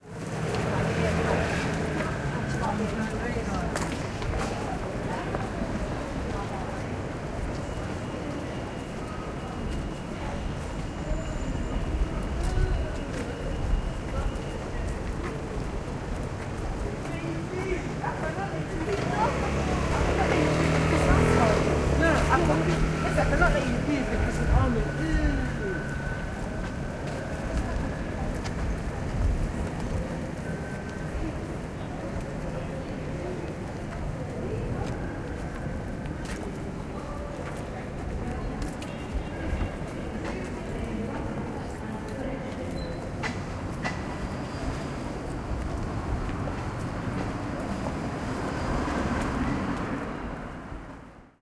Station Side Street London little-Traffic People
Recording a small side street from Euston train station. You can hear the PA system in the background among people talking and a little traffic.
Equipent used: Zoom H4, internal mics
Location: UK, London, near Euston station
Date: 09/07/15
station, traffic, side-street, street, London, people, field-recording